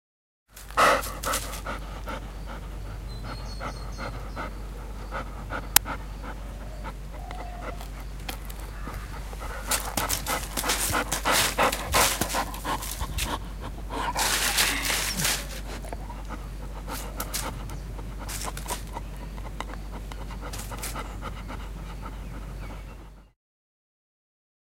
Dog panting
All the best.
Dharmendra Chakrasali